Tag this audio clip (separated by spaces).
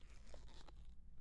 1 one lizard